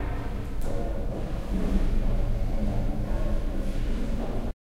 Someone walking on metal stairs in a pretty big basement. Recorded with Rode NT4 + Zoom H4.